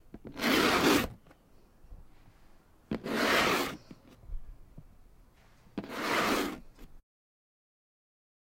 OWI Cardboard scratch
long nails scratching cardoard